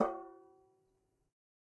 Metal Timbale left open 024
conga, drum, god, home, kit, record, timbale, trash